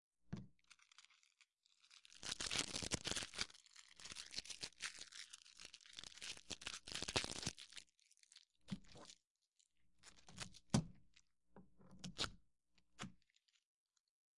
tape04-packing tape#4
Packing tape (clear, 2.5") on a cheap dispenser being stretched out and then the tape is rolled into a sticky ball.
All samples in this set were recorded on a hollow, injection-molded, plastic table, which periodically adds a hollow thump if the roll of tape is dropped. Noise reduction applied to remove systemic hum, which leaves some artifacts if amplified greatly. Some samples are normalized to -0.5 dB, while others are not.
packing, tape, stretch, sticky, balling